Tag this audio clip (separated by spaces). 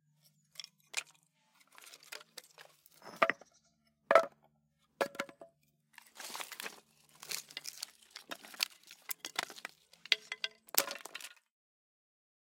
impact wood stone pile hit floor